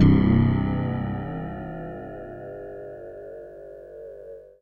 Volca FM Sound1
Volca fm ambient sound